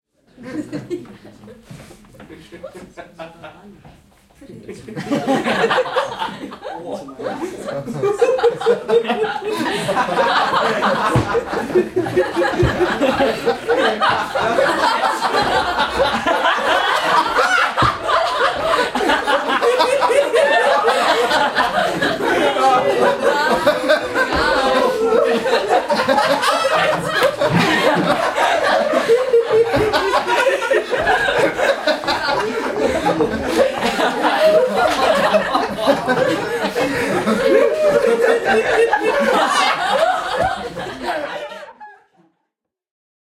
Ihmisjoukko nauraa sisällä. Alussa hiljaisemmin, nauru alkaa vähitellen, voimistuu ja kasvaa isomman joukon iloiseksi nauruksi.
Paikka/Place: Saksa / Germany
Aika/Date: 1985